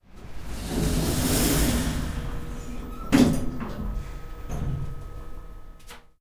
An elevator closing its doors. Recorded with Zoom H4 and edited with Audacity.